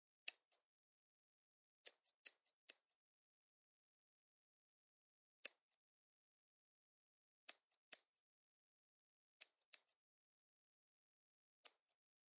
a mono recording of a pair of scissors
scissors, snip